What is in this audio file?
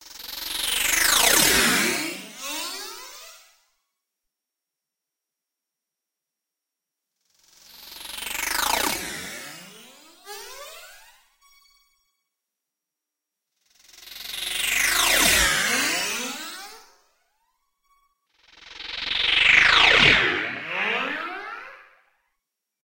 grain pass4<CsG>
granular passby. Created using Alchemy synth
sound-design, granular, whoosh, effect, alchemy, fx, passby, sounddesign, scifi, digital